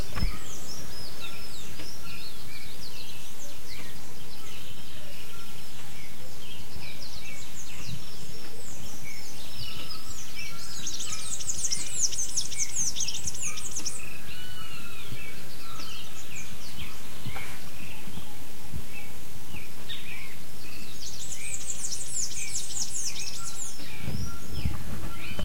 morning-birds short01
Recording taken in November 2011, at a inn in Ilha Grande, Rio de Janeiro, Brazil. Birds singing, recorded from the window of the room where I stayed. Strangely, the bird sounds here are better than the ones I recorded in the forest :P.
bird, birds, brazil, field-recording, ilha-grande, morning, rio-de-janeiro